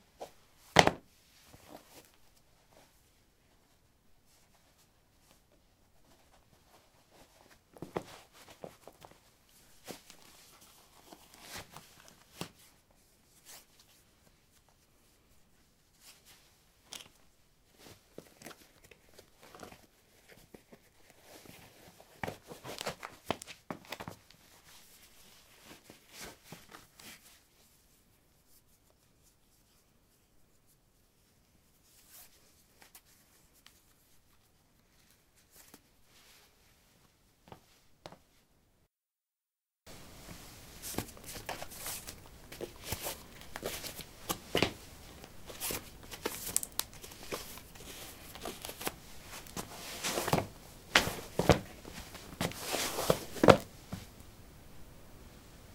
concrete 11d sneakers onoff
Putting sneakers on/off on concrete. Recorded with a ZOOM H2 in a basement of a house, normalized with Audacity.